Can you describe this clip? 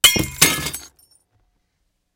glass break
Glass broken on concrete block over plastic tub
Recorded with AKG condenser microphone M-Audio Delta AP
crash, glass, glass-break, smash